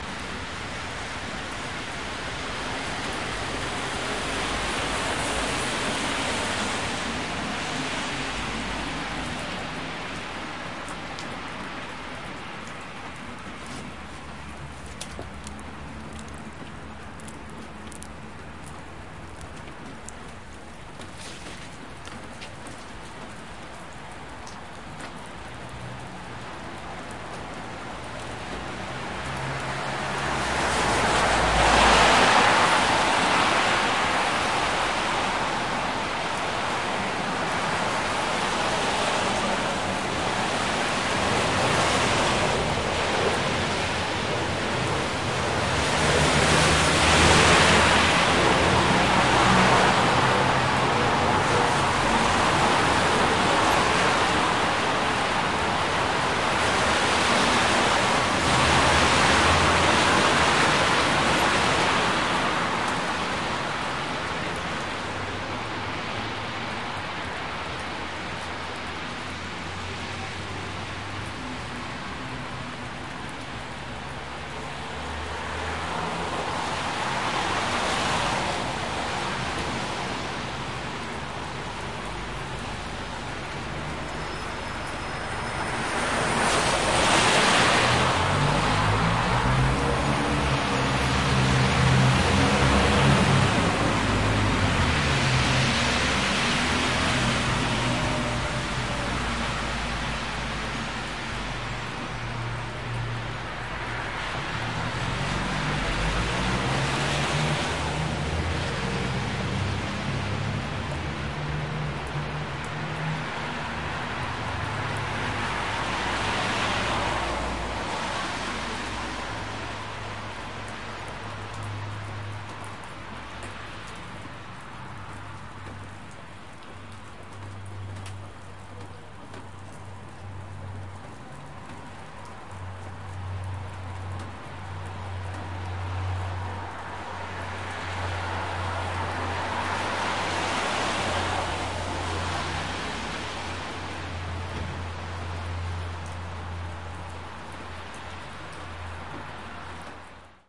Liverpool Bus Stop Raining Part 1
Liverpool Bus Stop Raining
Part 1
Recorded with Zoom H4N
48000kHz 16bit
1, 16, Bus, Liverpool, Part, Raining, Stop